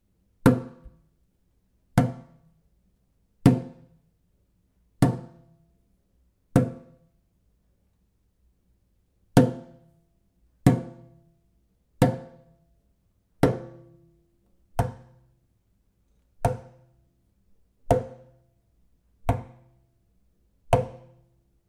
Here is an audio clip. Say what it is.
Kitchen Sink Hit 02
This recording is from a range of SFX I recorded for a piece of music I composed using only stuff that I found in my kitchen.
Recorded using a Roland R-26 portable recorder.
Foley, Cooking, House, Indoors, Kitchen, Percussion, Household, Home